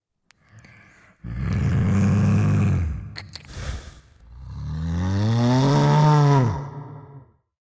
One of the "Bull" sounds I used in one play in my theatre.
breathe, bull, monster, roar